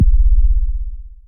ms-kick 4
Percussion elements created with the Korg MS-2000 analog modeling synthesizer for the album "Low tech Sky high" by esthing on Friskee Media
kick, analog, modeling, percussion, synthesizer, raw